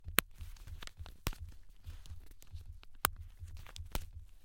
Unbutton Wallet
Unbuttoning of a wallet. Recorded with Motu 896 and Studio Projects B-1. In the Anechoic chamber of the HKU.
anechoic, clicks